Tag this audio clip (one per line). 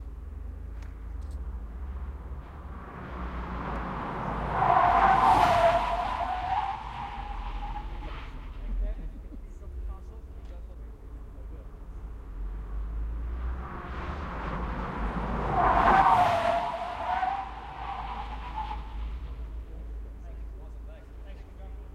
vw
emergency
golf
stop
gti
tyres